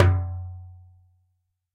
Doumbek Doum2
Recording of my personal Doumbek 12”x20” goblet hand drum, manufactured by Mid-East Percussion, it has an aluminum shell, and I installed a goat-skin head. Recording captured by X/Y orientation stereo overhead PZM microphones. I have captured individual articulations including: doum (center resonant hit), tek (rim with non-dominant hand), ka (rim with dominant hand), mute (center stopped with cupped hand), slap (flat of hand), etcetera. In addition I have included some basic rhythm loops which can be mixed and matched to create a simple percussion backing part. Feedback on the samples is welcome; use and enjoy!
Darabuka, percussion, hand, dumbek, African, Silk-Road, stereo, Egyptian, Middle-East, Doumbec, Tombek, drum, Djembe